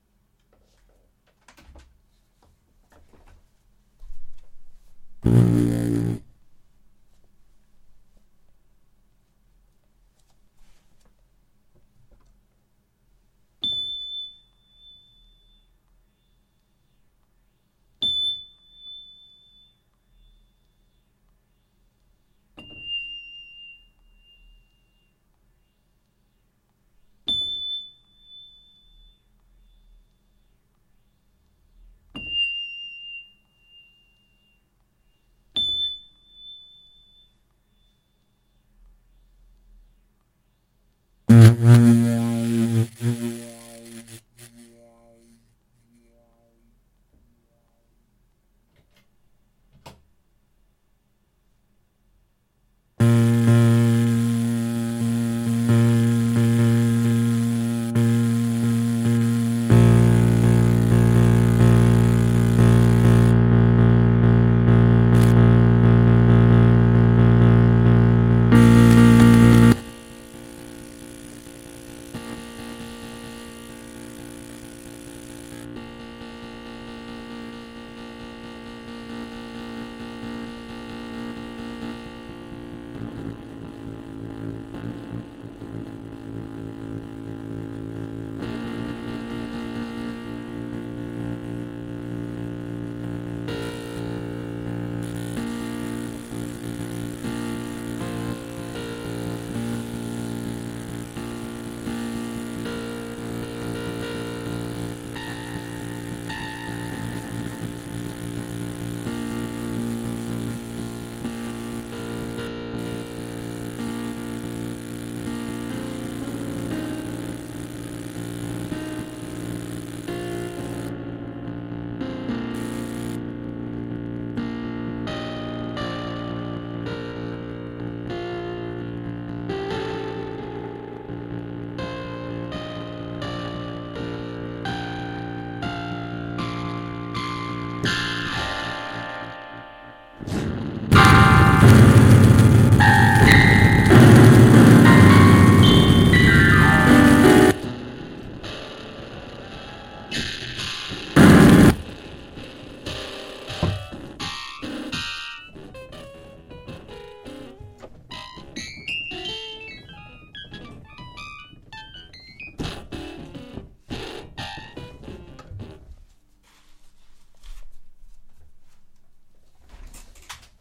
Wersi PianoStar 2000 - ca. 1970 Analogue E-Piano / Organ
some hitting of the keys
Analouge, Keys